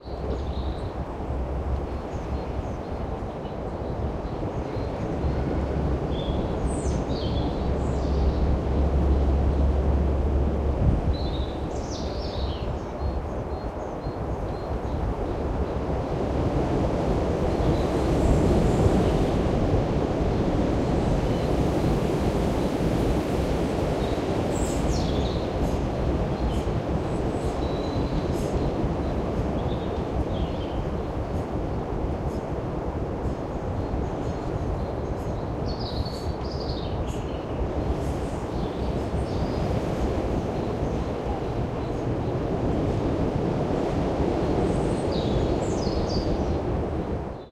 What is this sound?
Birdsong and wind through trees in Stockwood Park
The sound of spring birdsong in a Luton (UK) park with the wind blowing through the trees above.
birds, birdsong, field-recording, nature, park, trees, urban, wind